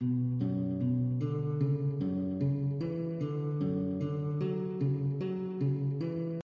low notes on guitar made on loop program